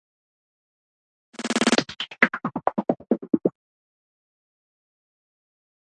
1 bar fill using a percussion loop and sweping the filter